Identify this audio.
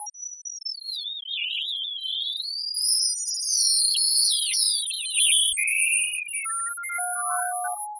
zografies nees 006
Sound made by Atmogen software